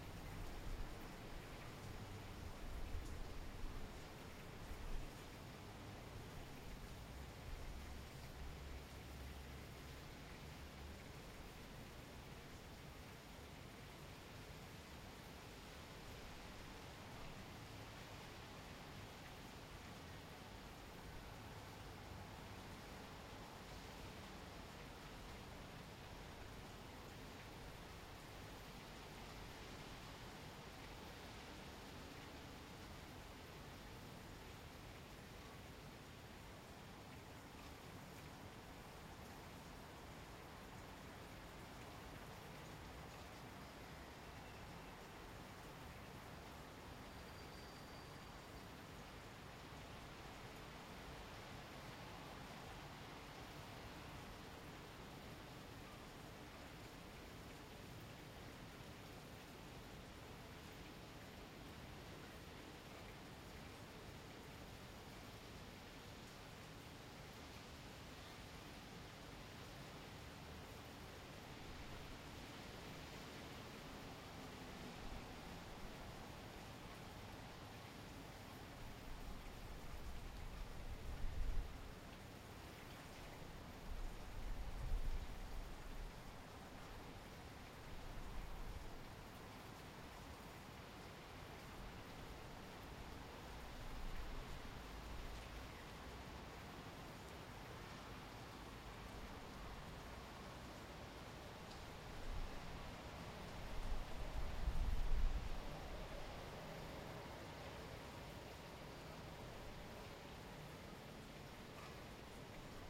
Rainy Day 1
Recorded from the balcony of an inner city apartment building in early morning on a moderately rainy day. Location recording with no processing.
atmos, city, day, exterior, morning, rain, rainy